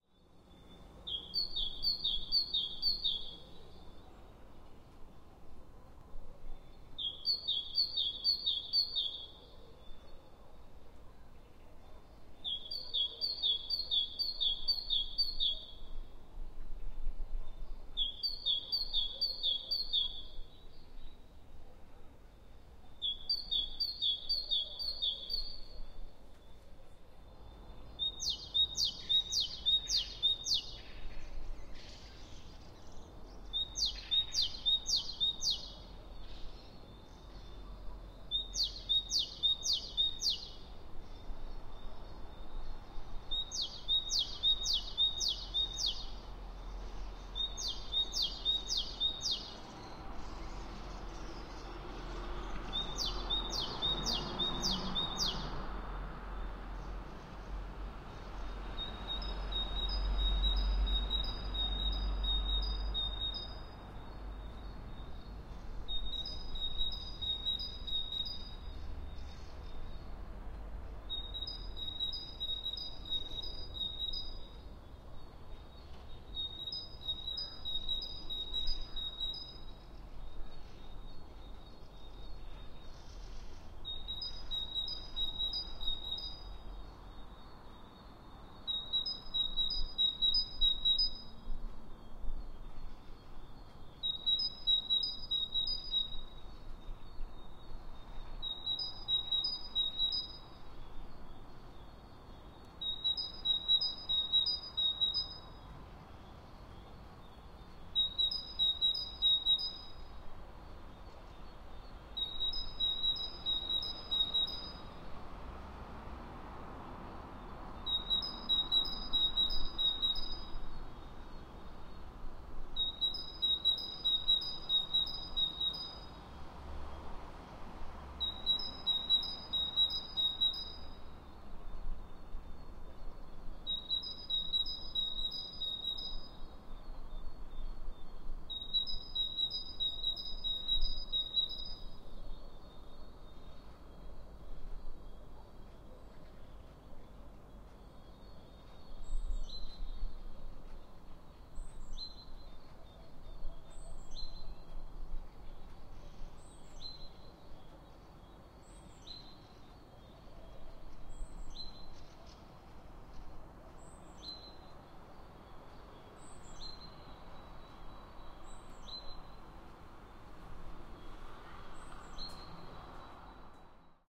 Morning birds spring may Omsk
birds, city, morning, Omsk, pcm-d50, Russia, spring